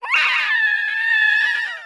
A soul wrecking high pitched voice sound effect useful for visages, such as banshees and ghosts, or dinosaurs to make your game truly terrifying. This sound is useful if you want to make your audience unable to sleep for several days.